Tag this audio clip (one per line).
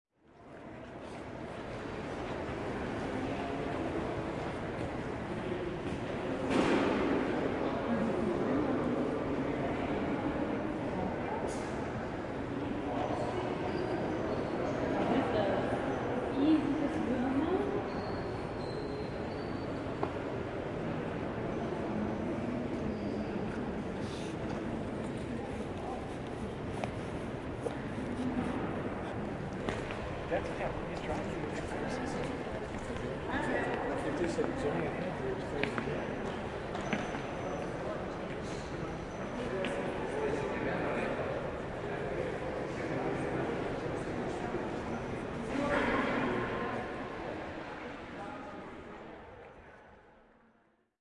ambience
atmosphere
british-museum
field-recording
museum
voices